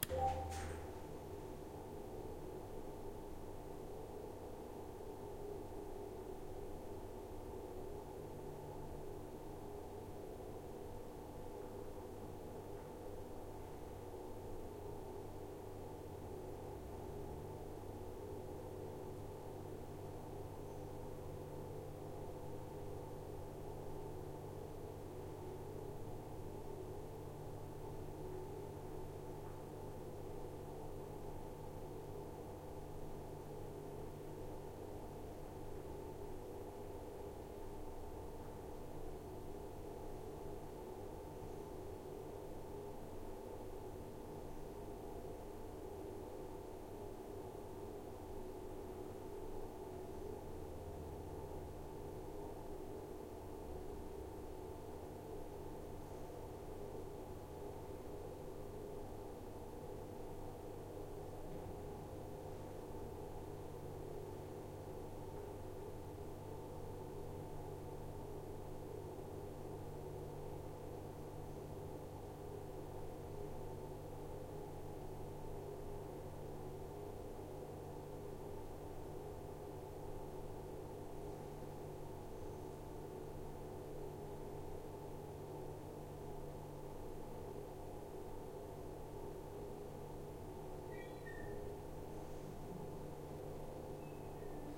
RoomTone Small WC Bathroom Ventilation2
Bathroom, Roomtone, Ventilation, WC